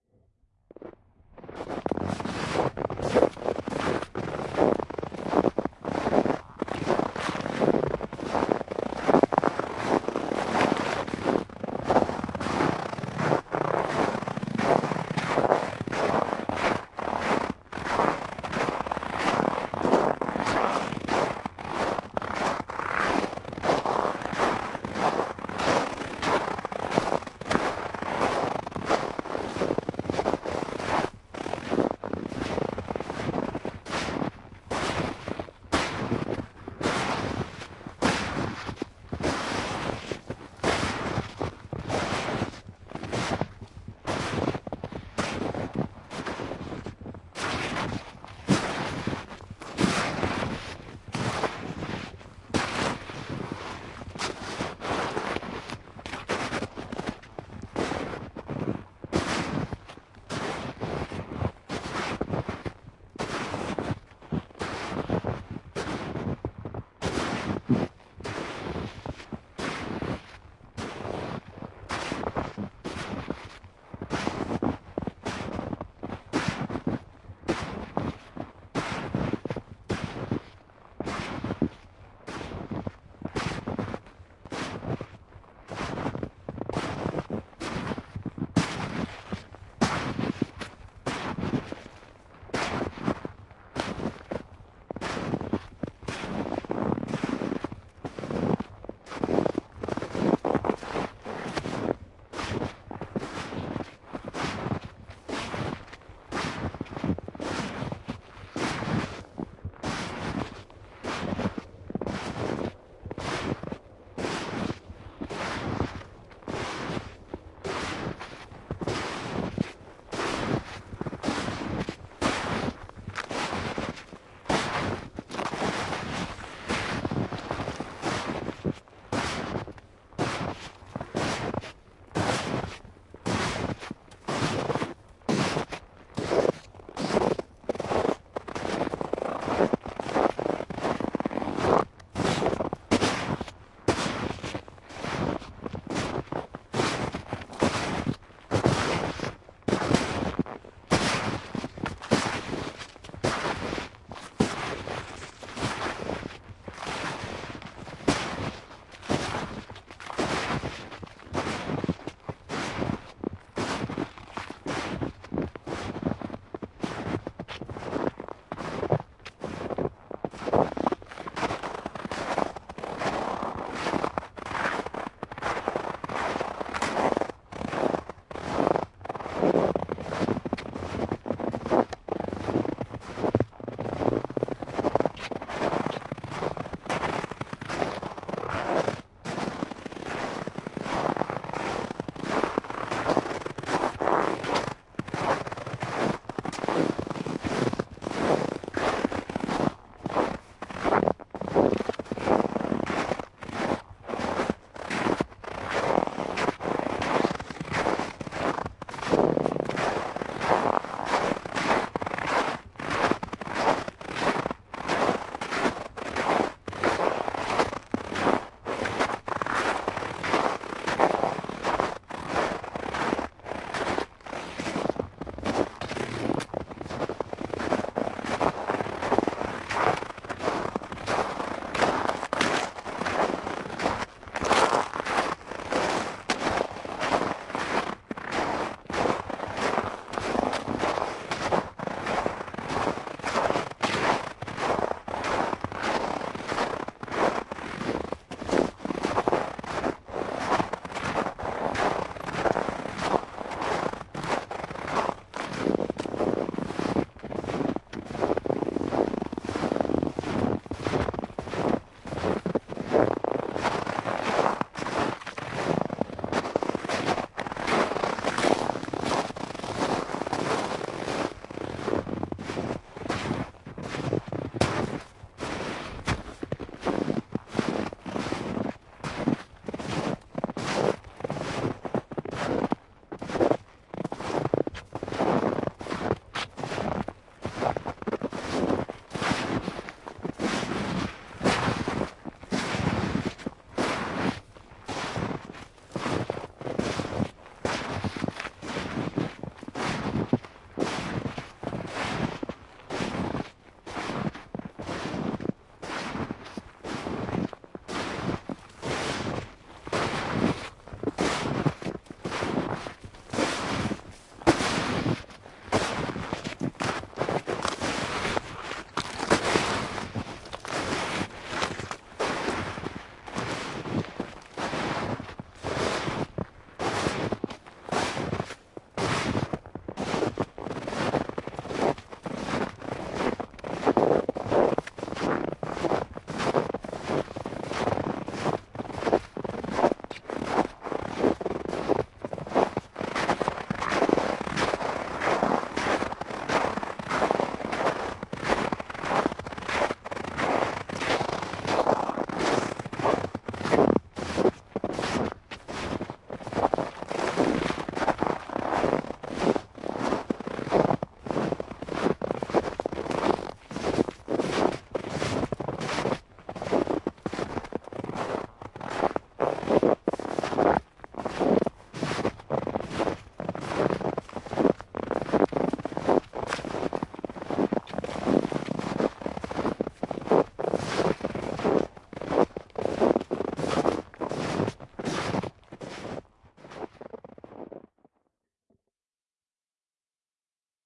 A stereo field-recording of walking on snow of various depths from a few centimeters to over a meter. Recorded at night so there is no birdsong. Zoom H2 (screwed on to a gorillapod) front on-board mics.